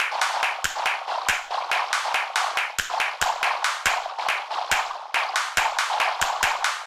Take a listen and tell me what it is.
A noisy techno loop with claps and finger snaps